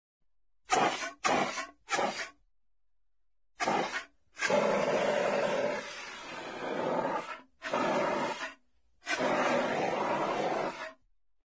J7 fire extinguisher
fire extinguisher quenches fire